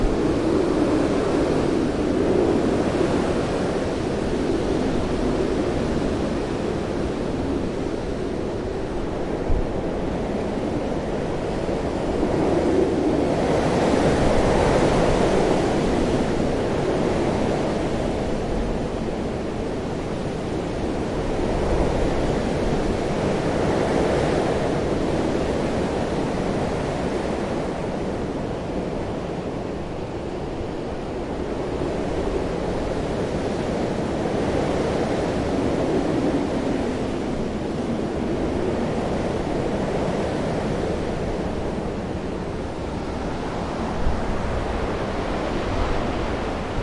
Hurricane Ophelia - Youghal, Co. Cork, Ireland - 16th October 2017 (2)
Hurricane Ophelia - Youghal, Co. Cork, Ireland - 16th October 2017
Hurricane, 2017, trees, Ophelia, Cork, Co, Youghal, windy, blow, wind, blowing, Ireland, howling